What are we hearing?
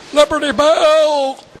philadelphia libertybellloop
A loopable absurd outburst that I usually cut out once when editing inside Liberty Bell exhibit in Philadelphia recorded with DS-40 and edited in Wavosaur.
loop, liberty-bell, independence-hall, philadelphia, field-recording, city